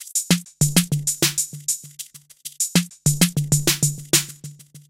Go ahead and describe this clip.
DM 98 808 top
Vintage drum machine patterns
Loop, Drums, Machine, Retro, Electric, Electronic, IDM, Vintage, Electro, DrumLoop, Beat, House, Trap, Drum